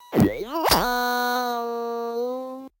FreakenFurby Glitch 11
Samples from a FreakenFurby, a circuit-bent Furby toy by Dave Barnes. They were downsampled to 16-bit, broken into individual cues, edited and processed and filtered to remove offset correction issues and other unpleasant artifacts.